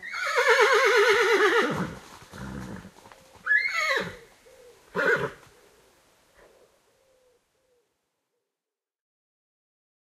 The sound of a horse whinnying, recorded near Auchtermuchty, Fife, Scotland.
Recorded on a Sharp MD-SR40H mini disc with a Audio Technica ART25 stereo microphone
horse, neigh, whinny